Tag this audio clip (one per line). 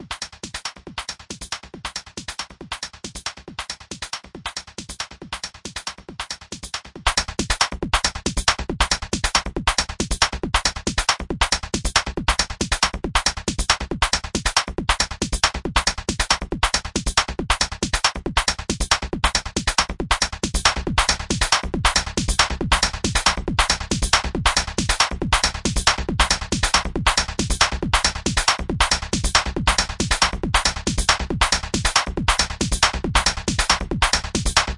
rave
dance
beat
loop
club
trance
techno
ultra
hard-dance
hard-rave